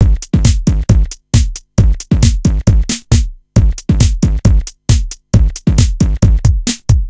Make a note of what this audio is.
A distorted 909 disco loop